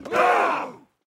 An ms stereo recording from a Battle of Hastings re-enactment